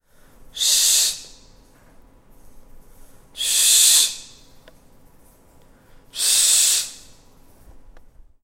sound made by a person to request silence in the library.
UPF-CS13, campus-upf, silent